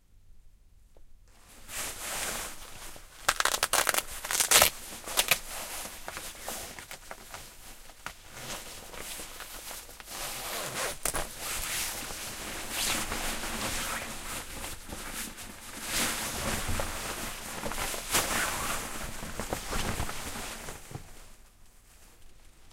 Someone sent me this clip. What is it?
20081130.jacket.off
getting dressed with a heavy-duty jacket: nylon rubbing, zipper and clip. Shure WL183, Fel preampm, Edirol R09 recorder
clothing, dressing, heavy-jacket, winter